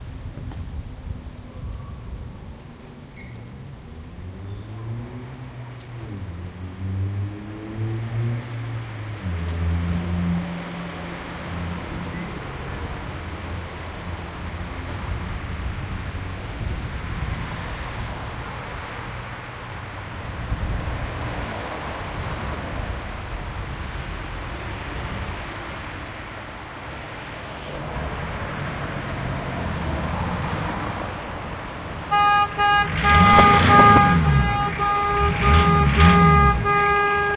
This is a traffic atmosphere from Sofia city with loud horn at the end.
city-traffic, city-recording, car-horn